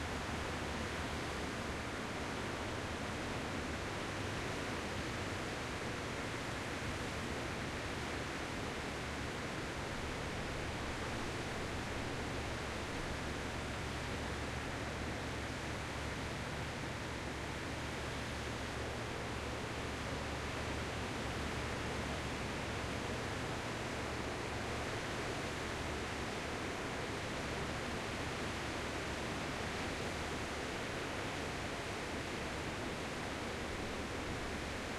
Ocean Noise - Surf
Thanks! And if you do use it share what it was used in down in the comments. Always cool to see where things end up.
Recorded from a balcony overlooking the ocean. General surf noise.
Recorded in Destin Florida.
beach, waves, tide, ocean, sea, lapping, water, shoreline, coast, breaking-waves, wave, surf, Droning, seaside, sea-shore, field-recording, Crashing, shore